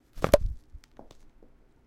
Whipit Skadoosh
The sound of me opening the lid of a small plastic container.
container, drum, drums, lid, open, opening, percussion, percussive, plastic, sample, skadoosh, sound, whipit